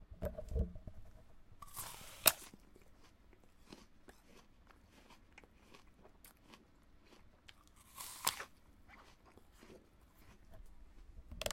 Me biting a green granny smith apple, twice.